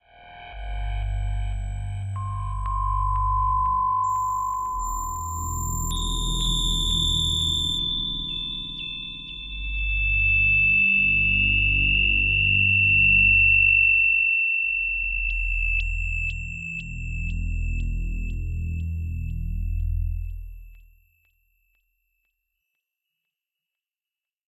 The monster...it comes...for pancakes...
glitch, spooky, eerie, deep, dark, ambient, atmosphere, creepy, scary